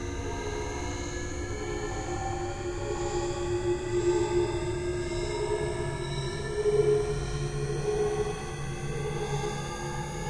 This emulates the sound of accelerating to warp speed or some other crazy fast velocity. Originally a clock and pendulum, modified of course. (During playback, there is a chirping sound, but upon download, it vanishes when played.)